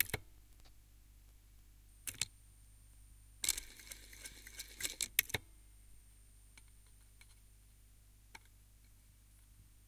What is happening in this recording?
Queneau machine à coudre 23
son de machine à coudre
coudre, machine, machinery, POWER